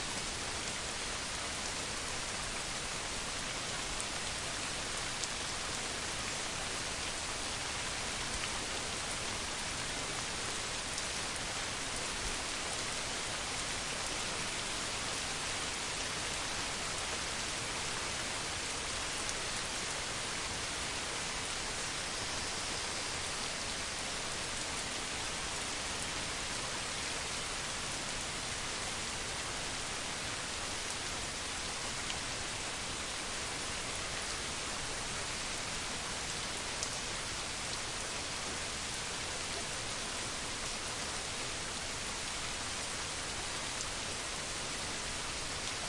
Heavy rain, splatty on stone
Heavy splatty rain on stone patio, quiet night